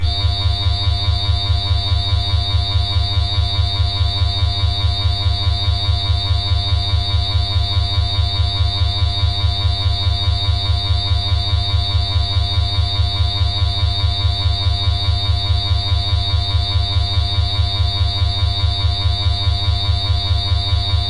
Audacity:
- Effect->Repeat
Number repeats to add: 100
- Effect->Echo
Delay time: 0.01
Decay factor: 0.9
- Effect→Normalize...
✓Remove DC offset
✓Normailze maximum amplitude to: –3.0
✓Normalize stereo channels independently